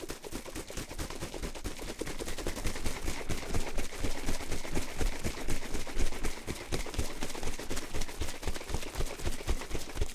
Shaking a container of liquid
Container, Liquid, Shaking